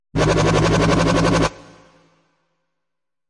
DnB&Dubstep 008
DnB & Dubstep Samples
dnb, drumandbass